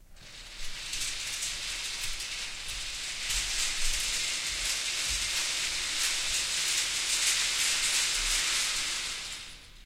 this is the second of a medium speed pull of the scrim across the stage.
This is a recording of a person running across the stage pulling a scrim that was hung on a track so we could divide the stage. The sound was so distinctive that I decided to record it in case i wanted to use it for transitions and blackouts.
This is part of a pack of recordings I did for a sound design at LSU in 2005.